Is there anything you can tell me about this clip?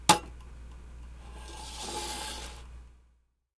metal compass hitting desk and being slid.
Recorded with h4n, editing with Adobe Audition CC 2014